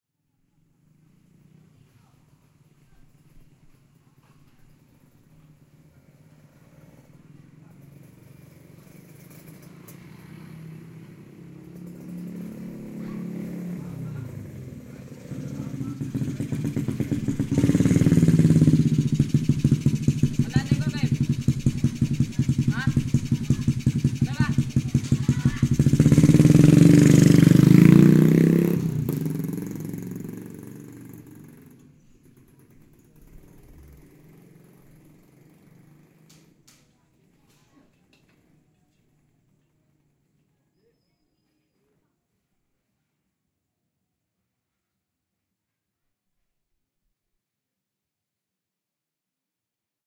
Bunyi no.5 motor lewat
motorcycle, motor, motorbike, engine